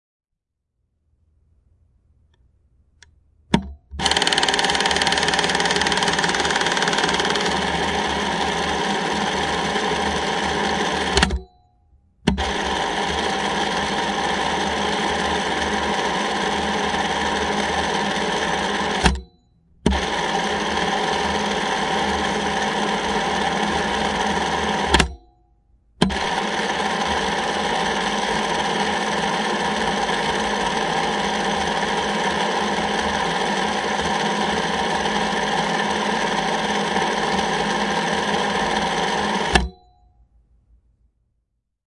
Super 8 camera SHORT burst filming
This is a Bell & Howell super 8 camera firing with the camera closed, covering the motor
cinema, film, film-projector, movie, oldschool, vintage